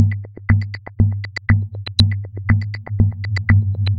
Tick loop 2 (120 bpm)
loop, fx, 120bpm